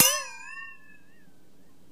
Dings with a pot of water with natural flanger effect
bell, clang, ding, flanger, gong, metal, metallic, ting, water